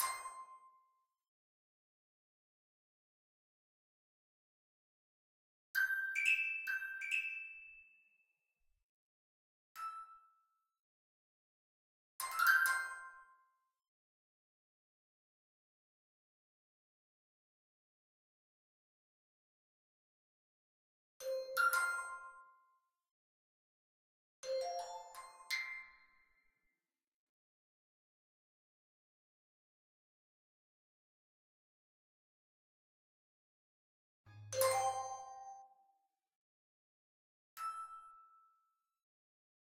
FX Made up mobile phone alerts Samsung iphone CHIMEBOX.L
Samsung or iphone style alert tones, made by converting existing mobile phone tones to midi and then playing with a synth (omnisphere) and changing the odd note.
bong, samsung, mobile, phone, buzz, alert, telephone, iphone, bing